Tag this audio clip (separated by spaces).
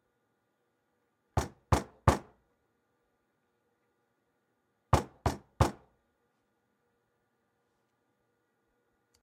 baning smack wall thump hit